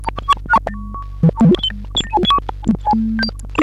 Watery and percussive FM synthesis from my circuit-bent Yamaha PSS-470. There is some slight (very) distortion and background hum which adds to the lofi quality.